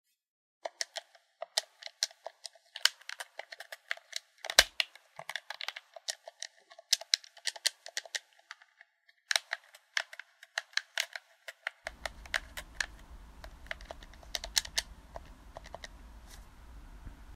Buttons being pressed on a video game controller.